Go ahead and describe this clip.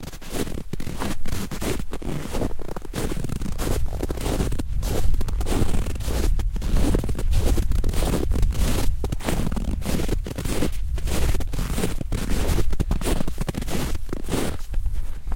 fotsteg mjuk snö 3 + vindbrus
Footsteps in soft snow. Recorded with Zoom H4.